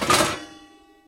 Crash of metal objects
chaotic, clatter, crash, objects